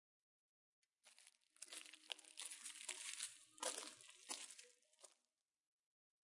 pasos sobre hojas
This sound has been recorded by a recording machine. In this recording we can hear the sound of someone walking over some leaves which are laying on the floor.
It has a reverberation efect on it.
a, field-recording, popular, tag